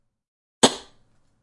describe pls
#6 Metal Tap
hit, impact, Metal, Tap, thud